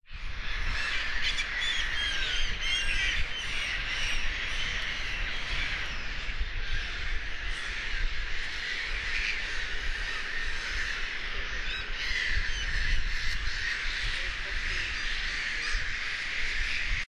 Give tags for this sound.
ambiance
ambient
atmosphere
birds
birdsong
field-recording
nature
Seagulls
soundscape
wildlife
yell

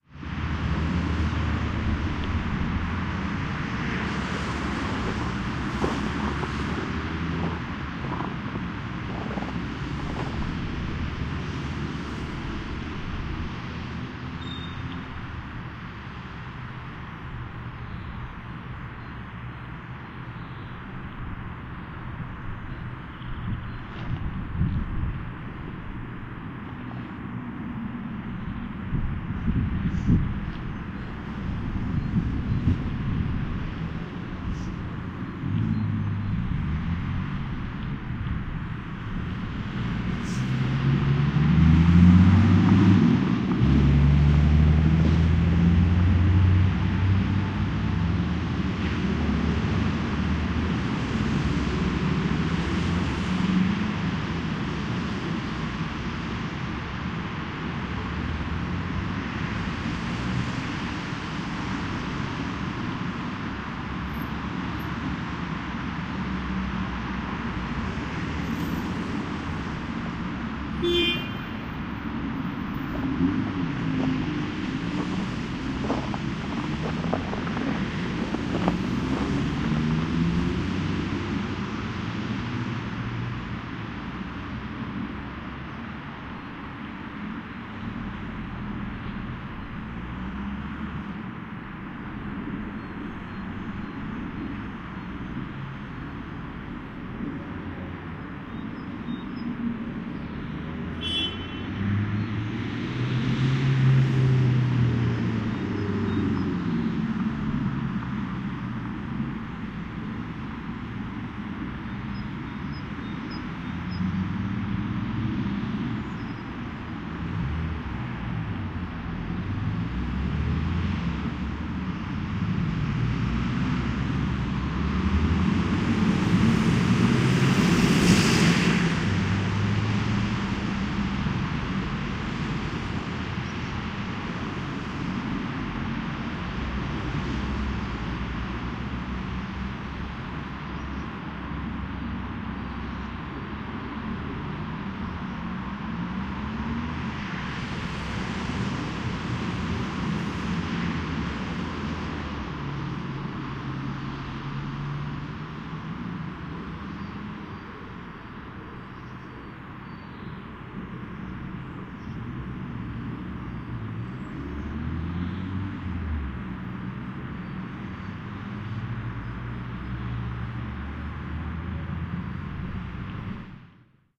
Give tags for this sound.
street
street-noise

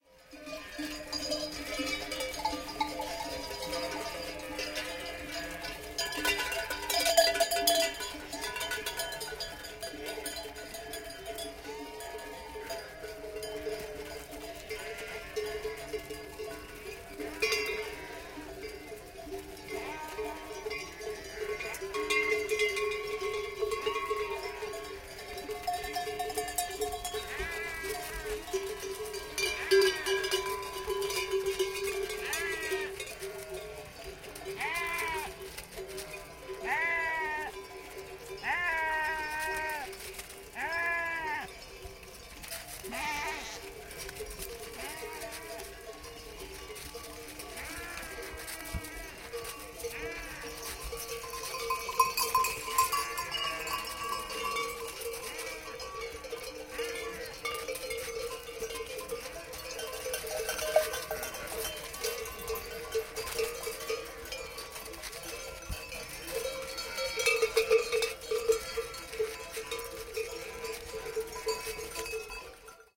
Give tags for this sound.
flock
sheep
field-recording
h1
okm-II
mountain
nature
zoom
binaural
rural
catalonia
soundman

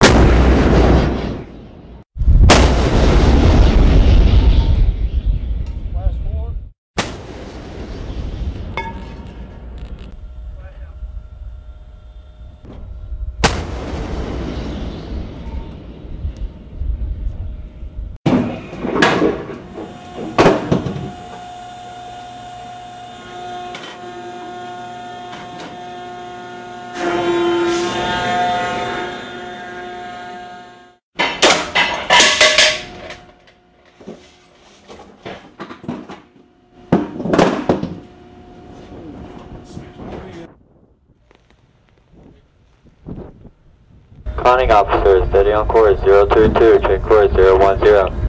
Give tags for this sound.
shot
naval
ambient
voice
fight
army
massive
projectile
destruction
mechanical
ambiance
shooting
turning
gun
explosive
fire
turret
commander
explosion
engine
humming
navy
military
captain
engines
firing
hum
aggression
battle
drone

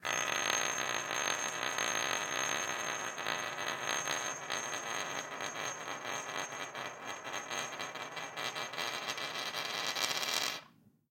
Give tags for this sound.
coin
jewel
jewellery
jewelry
money
ring
spin
spinning

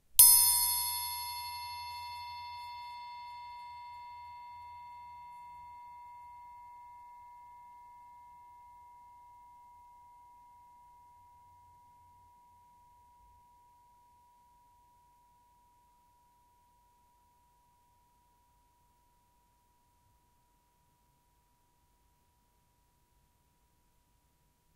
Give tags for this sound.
musical
punch

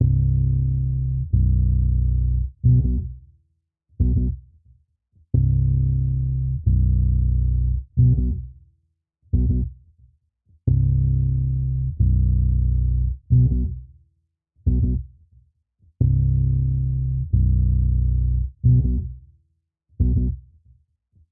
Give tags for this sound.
beat
music
backround
loop
90
piano
bpm
free
percs
drum
podcast
bass
loops

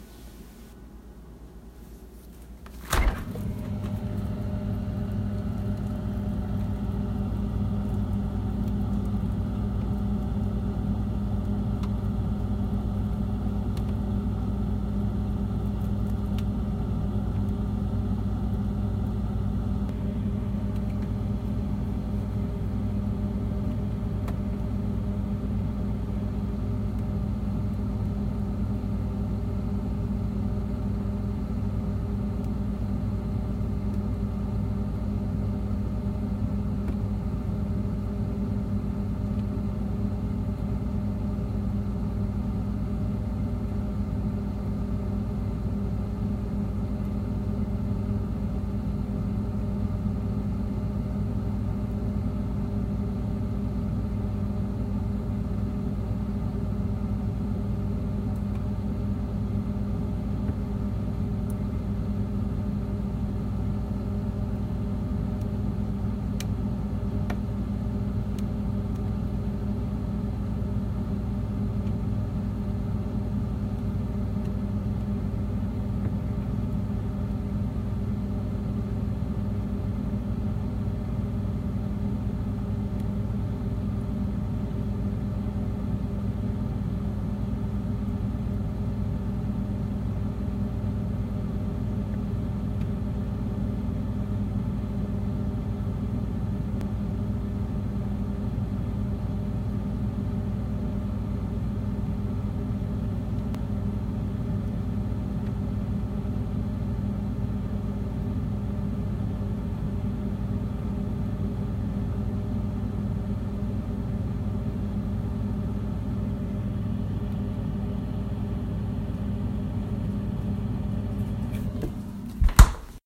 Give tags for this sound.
frigde
refrigerator
refrigerator-hum

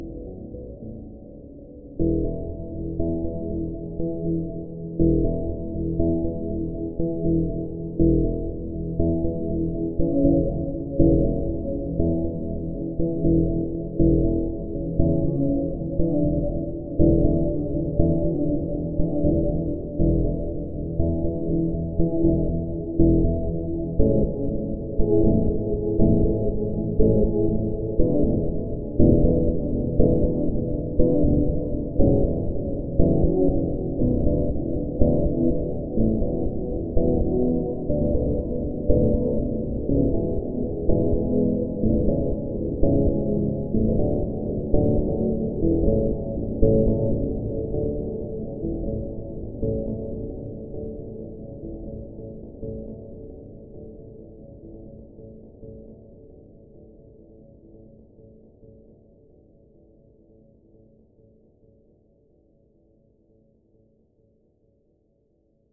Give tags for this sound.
Pattern
Arpeggio
Synth
LoFi

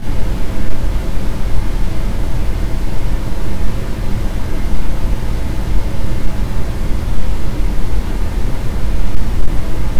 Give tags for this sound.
mono
reverb
noise
brown

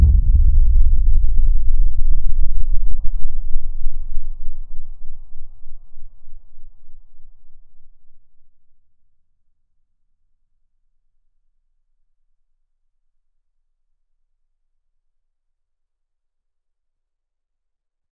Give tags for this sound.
breath
creature
dinosaur
growl
roar

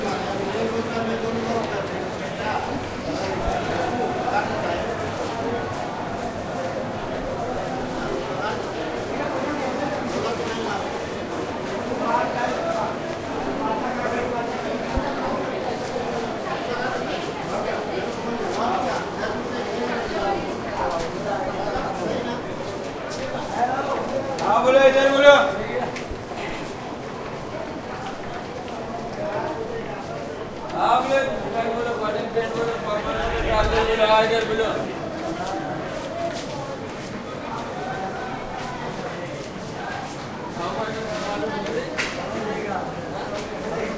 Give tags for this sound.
Ambiance
field-recording
Hindi
India
Market
Mumbai

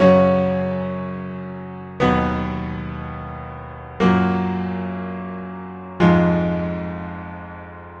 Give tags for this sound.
chords
piano

